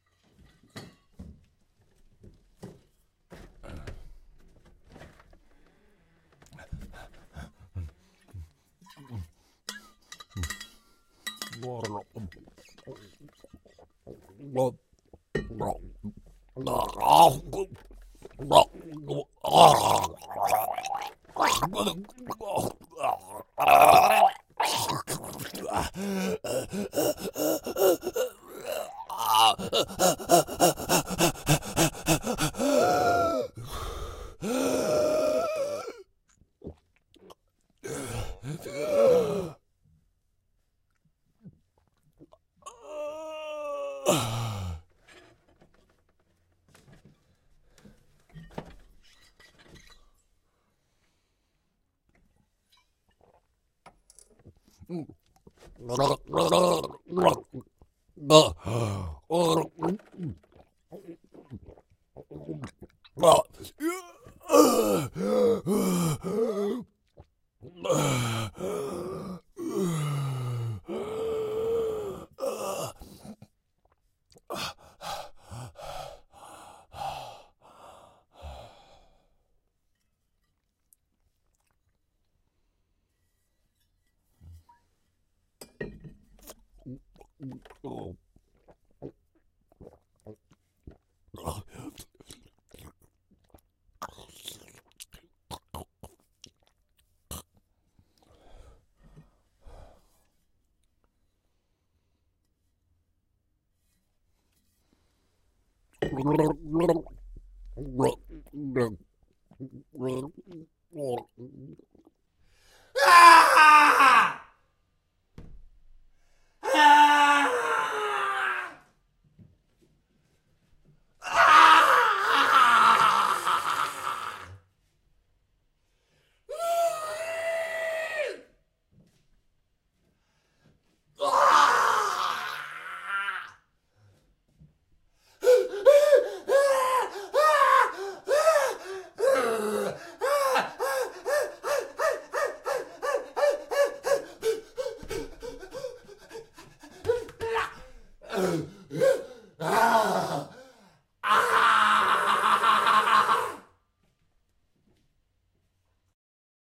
Male Screams
Own personal scream I did for my movie Rain Machine
It's magical to add secretly your own voice to an actor
agony, crazy, fall, falling, fear, pain, screak, scream, squeal, torment, yell